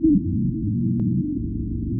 YP 120bpm Plague Beat A05
Add spice to your grooves with some dirty, rhythmic, data noise. 1 bar of 4 beats - recorded dry, for you to add your own delay and other effects.
No. 5 in a set of 12.
drum-loop, percussive, 1-bar, glitch, glitchy, percussion, data, minimal, loop, digital, glitchcore, rhythmic, beat, urban, uptempo, rhythm, drum-pattern, up-tempo, minimalist, percs, percussion-loop, 120bpm, drums, noise, idm, 120-bpm, dance, electronic, drum, 4-beat